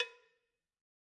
One-shot from Versilian Studios Chamber Orchestra 2: Community Edition sampling project.
Instrument: Percussion
Note: G3
Midi note: 56
Midi velocity (center): 1581
Room type: Large Auditorium
Microphone: 2x Rode NT1-A spaced pair, AT Pro 37's overhead, sE2200aII close
Performer: Sam Hebert
percussion, multisample, midi-note-56, g3, vsco-2, midi-velocity-46, single-note